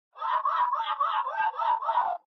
scream-to-laugh2
The scream male_Thijs_loud_scream was processed in a home-made convolution-mixer (Max/MSP) to create a laughter.
convolution, fx, laughter, processed